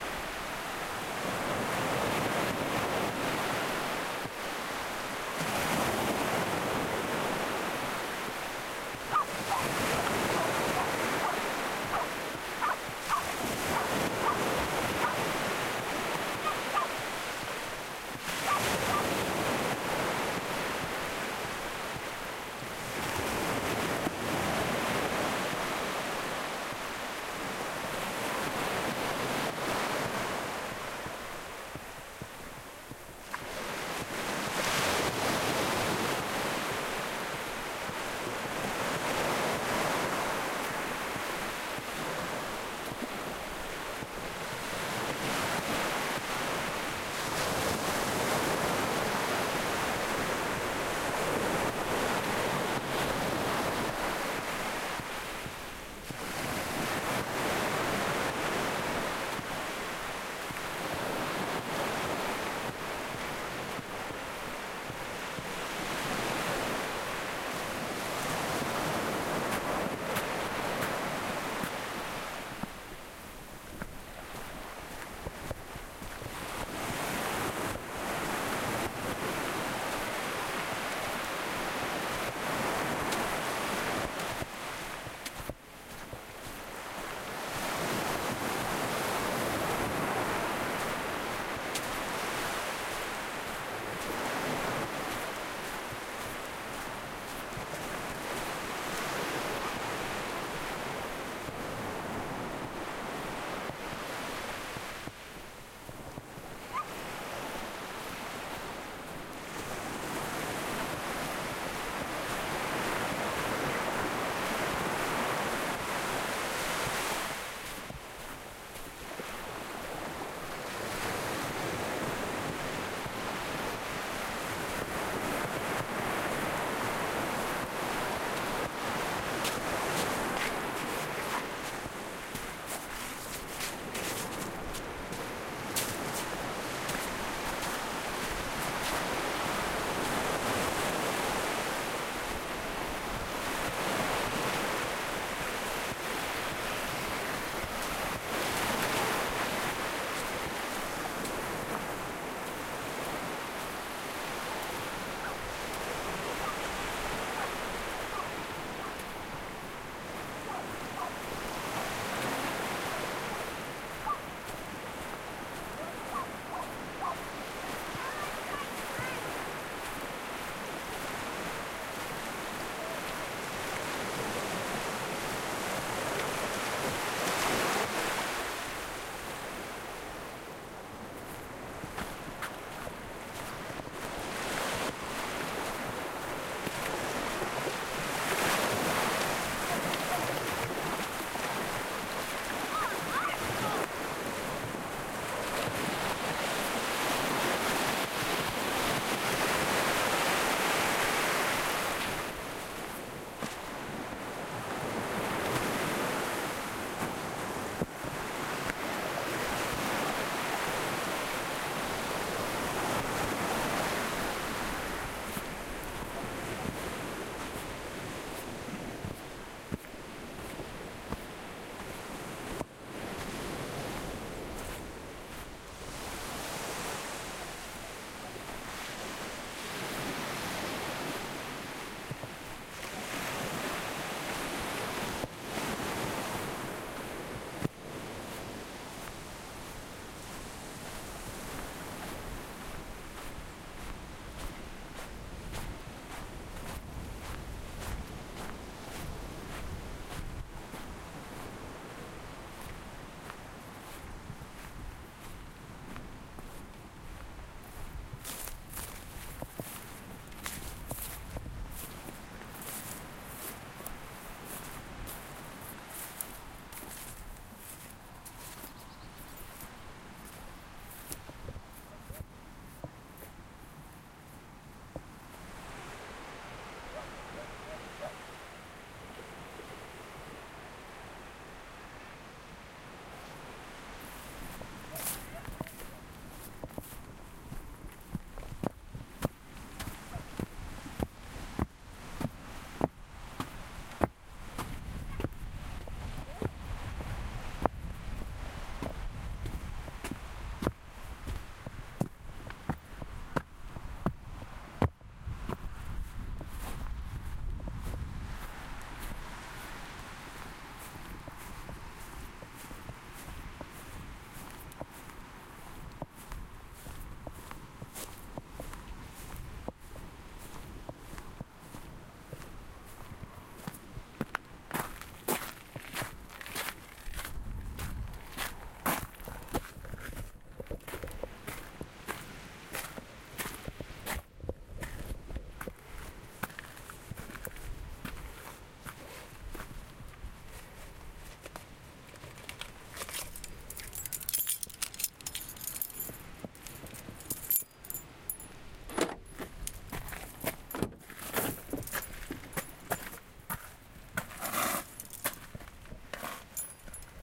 Content warning
I recorded this sound while walking to the firth of forth in Scotland near the town of Kirkcaldy. In the distance you can hear dogs barking.
seaside, waves, shore, beach, water, sea, coast, wave